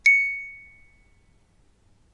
one-shot music box tone, recorded by ZOOM H2, separated and normalized
bell, box, music, tones